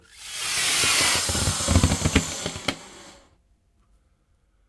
Balloon-Inflate-13-Strain
Balloon inflating. Recorded with Zoom H4
inflate, strain, balloon